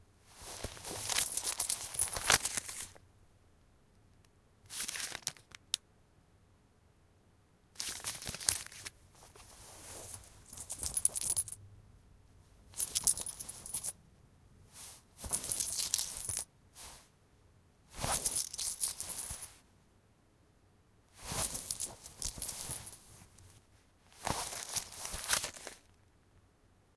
Picking loose change in pocket
Recorded with the Uni mics on a Tascam DR-100mkiii.
Taking some cash and/or loose change from denim pants pockets. Coins and notes.
cash, coins, money, picking